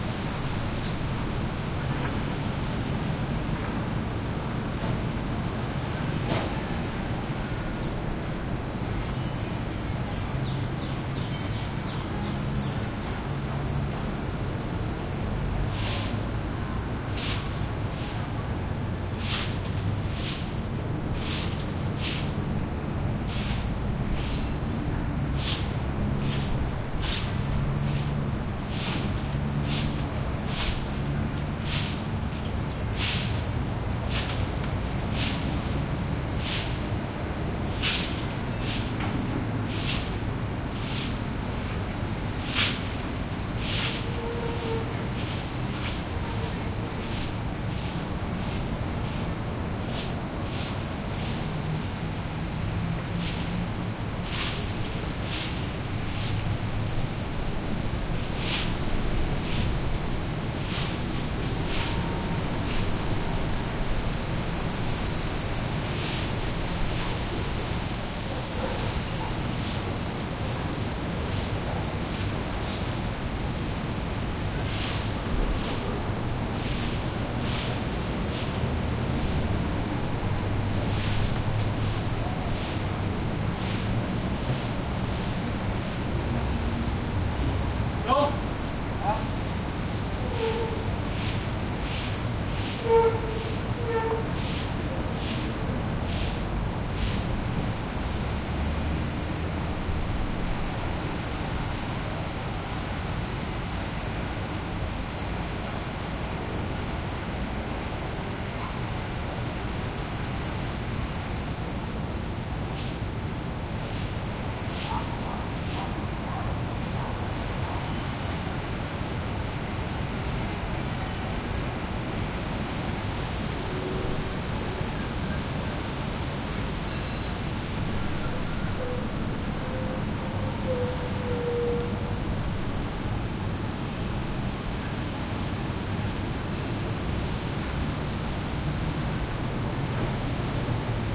Thailand Kata small beach town skyline traffic haze from hilltop with distant jackhammer and heavy breeze in middle +neighbour sounds sweeping, hostel staff